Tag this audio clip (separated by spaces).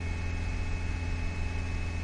High Motor SFX Engine Car Inside RPM Transportation BMW